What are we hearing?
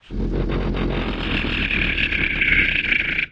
One of many quick scarecrow noises, but you use this for anything really. Original recording was made on an AKG C414 using the Earthworks 1024
There are more than 20 of these, so I will upload at a later date
creature, ghost, ghoul, horror, monster, scarecrow, zombie